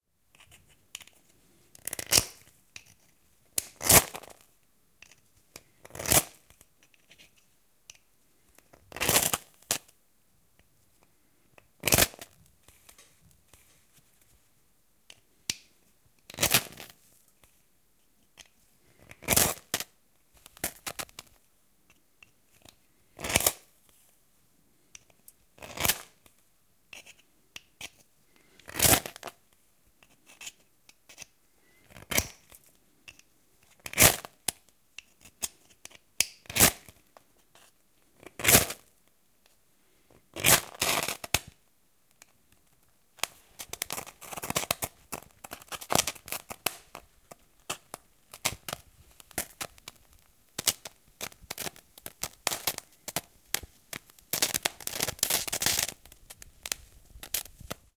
A stereo recording of lighting a fire with a modern version of a flint and steel. After about 41s the kindling fires up and the previously unsuccessful particles fizzle vigorously. Rode NT-4 > FEL battery pre-amp > Zoom H2 line in.
fire, firesteel, fizzle, flint, pyro, sparks, stereo